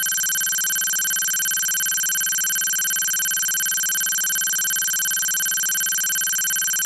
OSD text 2
Have you seen films like Terminator, played games like StarCraft Broodwar and seen TV series like X files and 24 then you know what this is.
This sound is meant to be used when text is printed on screen for instance to show date / time, location etc.
Part 2 of 10